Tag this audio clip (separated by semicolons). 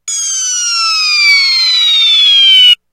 gameboy; nintendo; sega